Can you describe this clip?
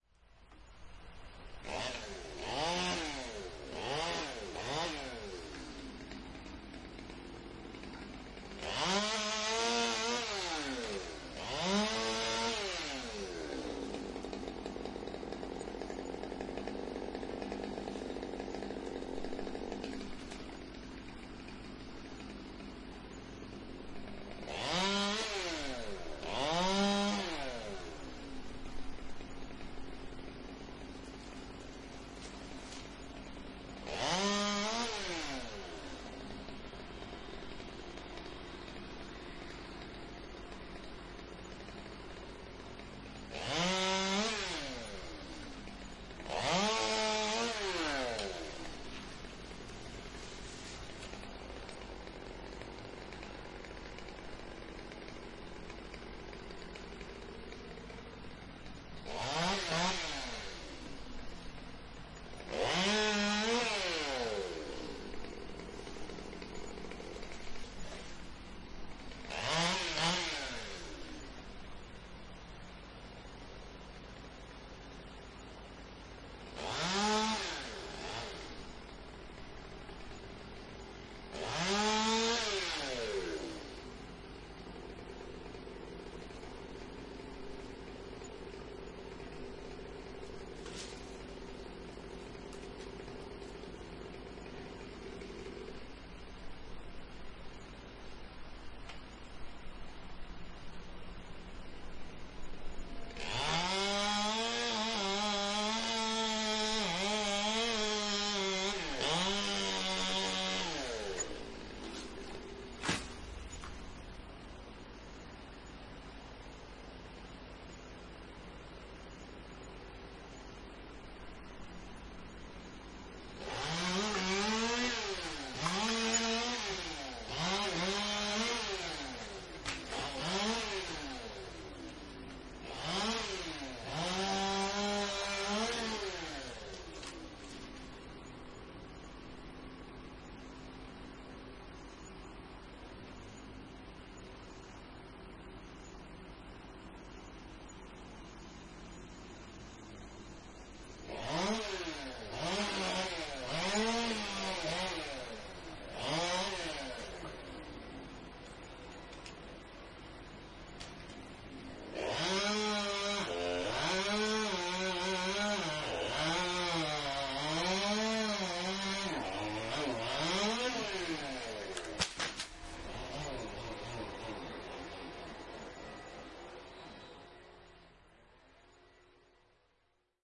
Chain Saw 01
Some tree work in the back yard for your "enjoyment."